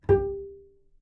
Double Bass - G4 - pizzicato
Part of the Good-sounds dataset of monophonic instrumental sounds.
instrument::double bass
note::G
octave::4
midi note::67
good-sounds-id::8751